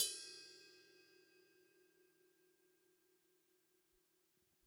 ride bell 3
Drums Hit With Whisk
Drums, Hit, Whisk, With